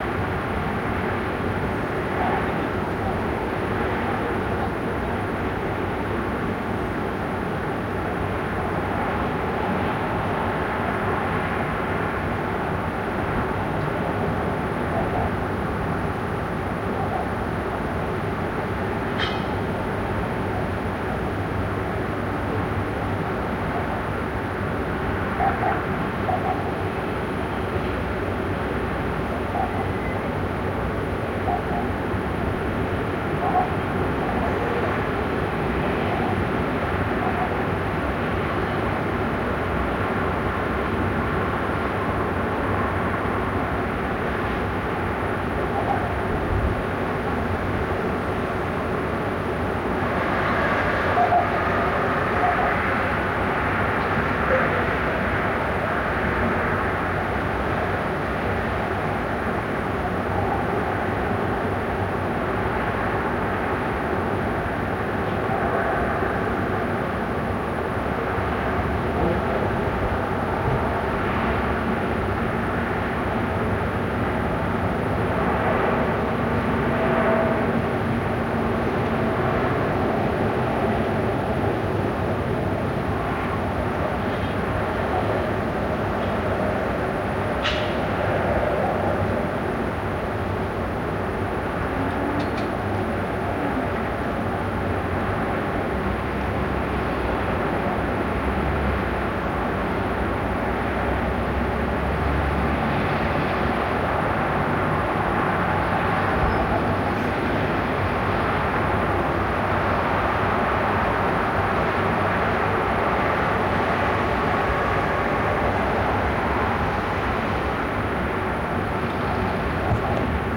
15th floor at a hotelbalcony by night - atmo
An atmo i did on my hotelroom during a production.
For professional Sounddesign/Foley just hit me up.
ambience, ambient, atmo, atmos, atmosphere, background, background-sound, balcony, barking, cars, city, dog, field-recording, general-noise, highway, hotel, loud, night, noise, noisy, soundscape, traffic